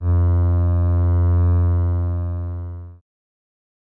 A synthesized cello sound created through AudioSauna. I'm not sure I'll ever find a use for it, so maybe you will. No claims on realism; that is in the eye of the beholder. This is the note C sharp in octave 2.